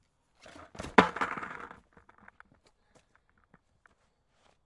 Skate jump on grass 3
Long board stake, hard wheels. Recorded with a Rode NT4 on a SoundDevices 702
grass, jump, long-board, skate